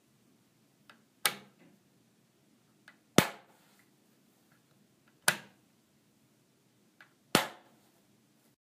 Lightswitch On Off
Turning a light switch on and off.
flip, light, switch, switched, switches, switching, turned, turns